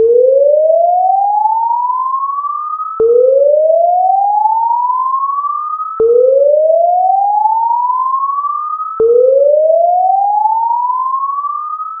BELLEUDY Cosima 2020 2021 Alarm
This sound is a synthetic sound created with a sinusoidal tone.
I generated one 3 secondes sinusoidal sound which I copied pasted 4 times, modulated the all sound and add some reverb effect.
siren, alarm, ring-tone, alert, synthetic